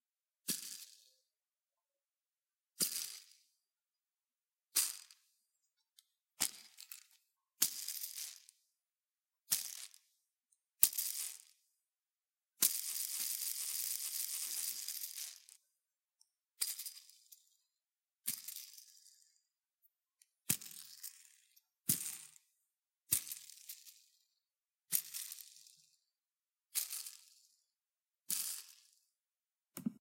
Wheel of a small toy spinning
small, spinning, toy, wheel